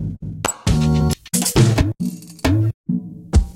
Dub (138 BPM-FIVE23 80171)
broken-step, glitch, table-effects, dub, fill